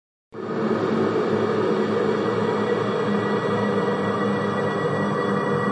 A creepy effect made in audacity :)